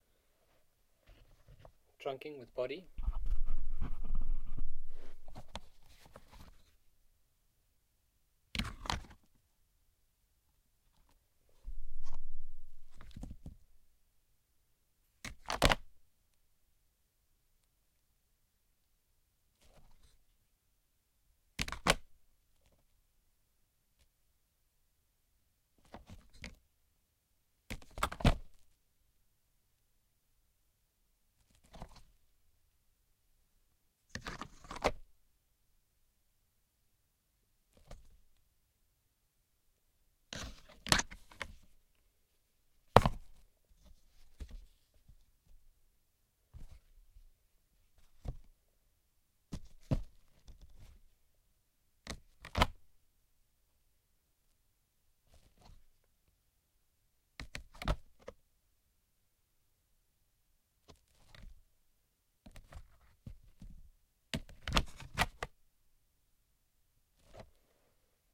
plastic trunking body
plastic trunking foley